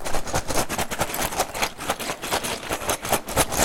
shaking of pencil bag